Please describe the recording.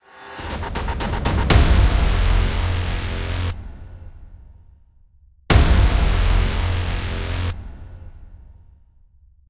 Cinematic Rise-and-Hit 03
Cinematic Rise-and-Hit sound.
impact, rise-and-hit